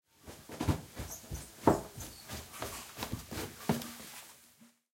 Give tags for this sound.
Lightly; Foley; Distant; Downstairs; Carpet; Stairs; Footsteps; Running

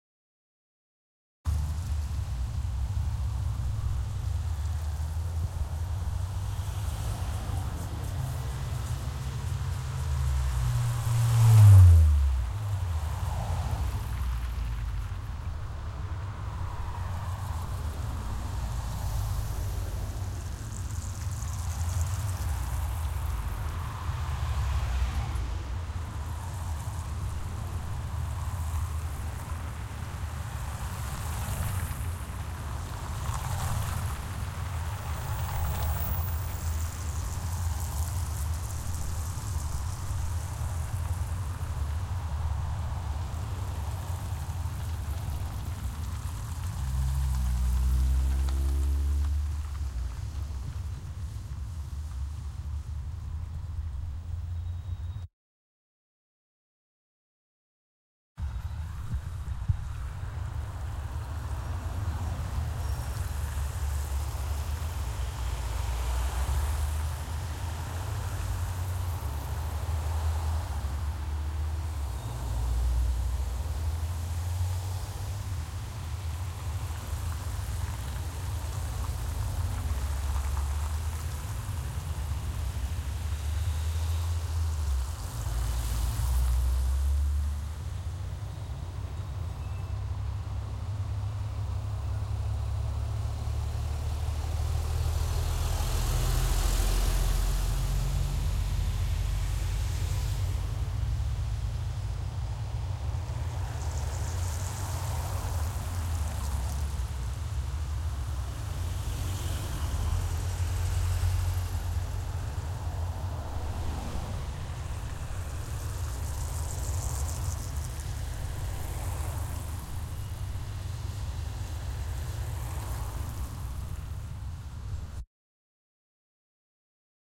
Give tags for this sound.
cars; city; street; traffic; urban

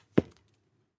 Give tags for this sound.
archer; archery; army; arrow; bow; bow-and-arrow; bullseye; close; closeup; fire; firing; flight; foley; impact; longbow; medieval; military; practice; projectile; shoot; shooting; shot; string; target; war; warbow; warfare; weapon; weapons

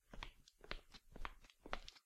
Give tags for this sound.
walk; shoes; foley; footsteps; tiles

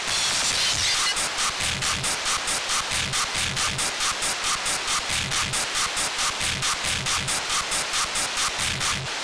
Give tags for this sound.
beats; closer; crunched; drum; glitch; hiking; insanity; maching; processed

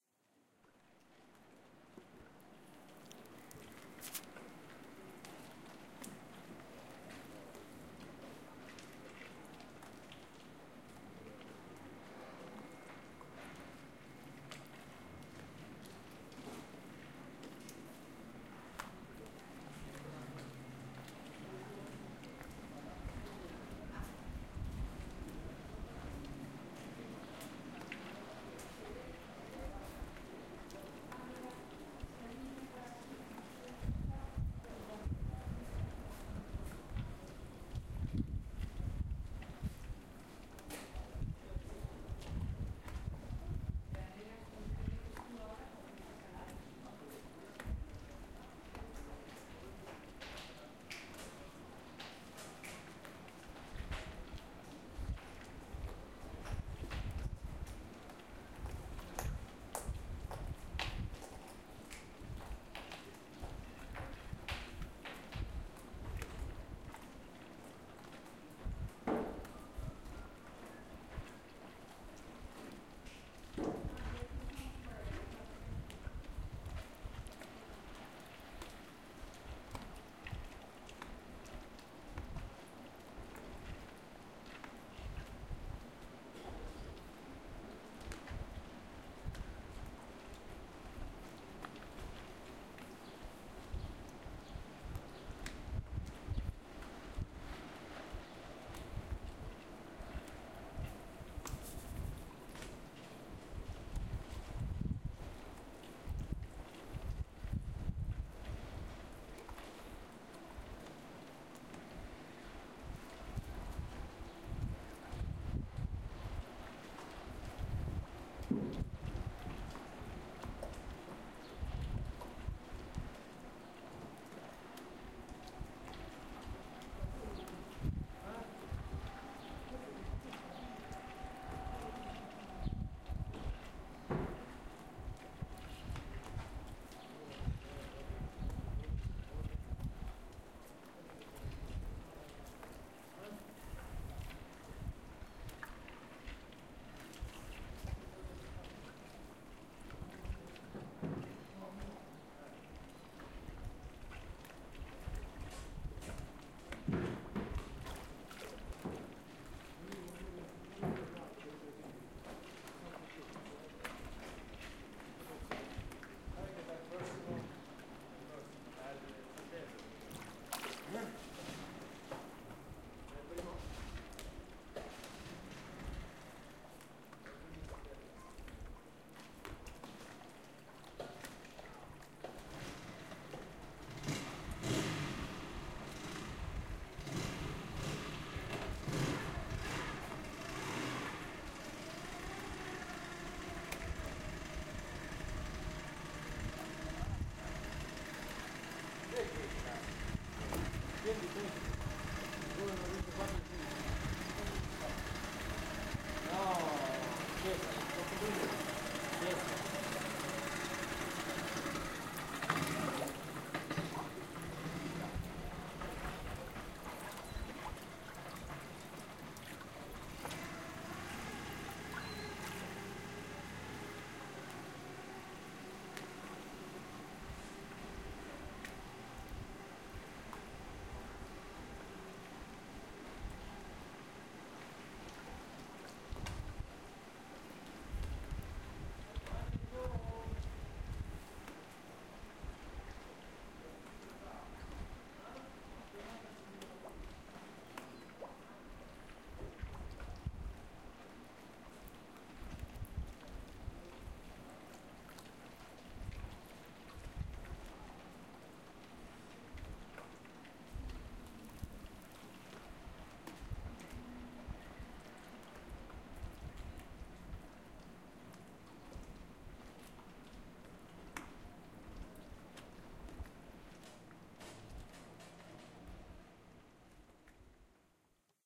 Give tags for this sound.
field; recording; alley

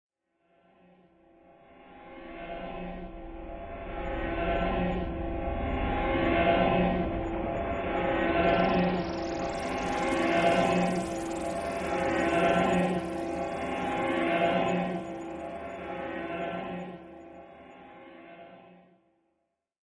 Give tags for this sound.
engine; science-fiction; sounds; spaceship; spooky; tardis; time; time-travel; travel

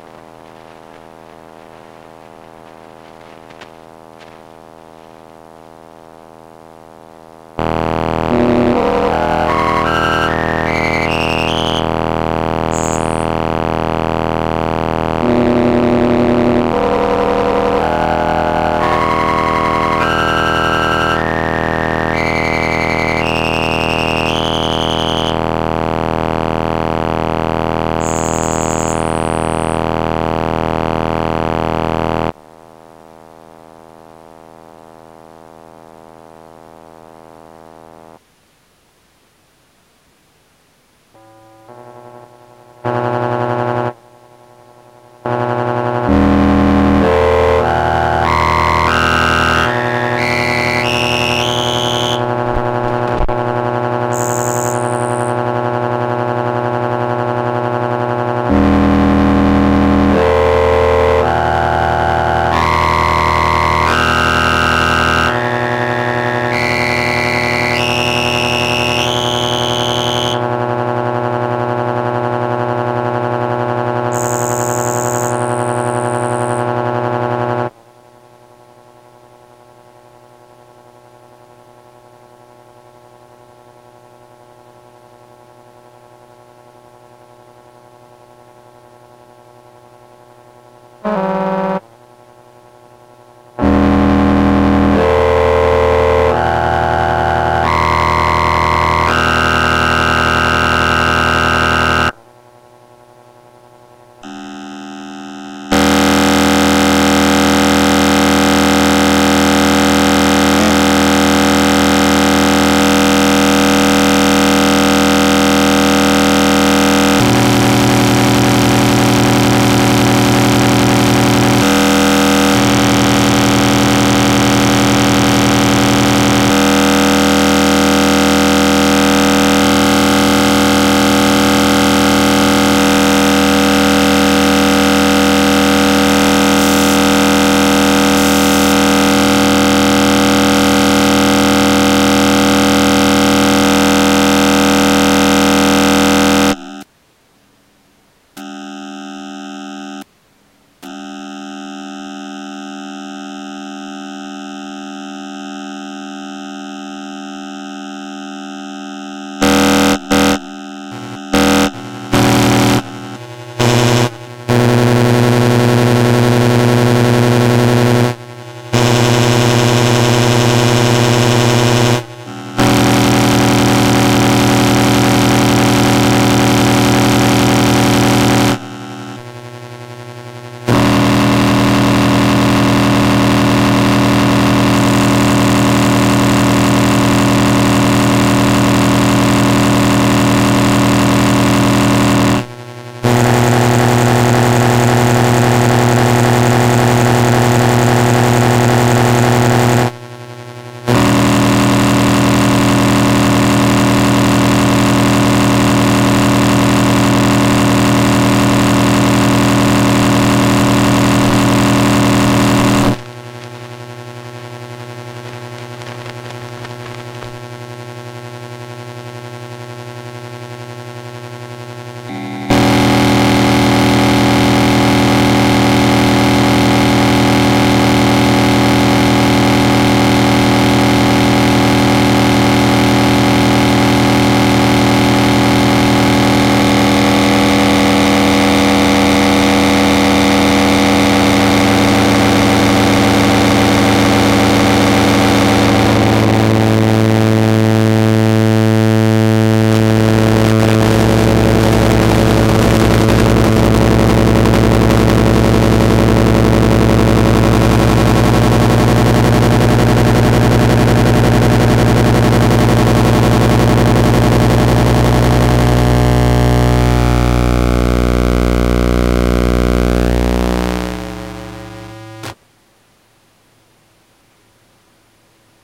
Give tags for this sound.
Kulturfabrik; Synthesizer

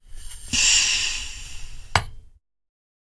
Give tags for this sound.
Gas; Metal; Pressure; Pump; Valve

